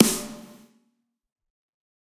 Snare Of God Drier 020
pack, realistic, drumset, kit, set